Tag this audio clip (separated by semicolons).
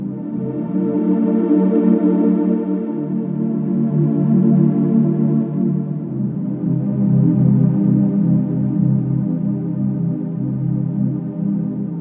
atmosphere; digital; warm; ambient; sound-design; loop; synthesizer; quiet